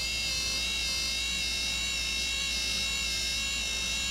Airplane flaps,
Sound of aircraft flaps.
landing-flaps, airplane-flaps, aircraft-flaps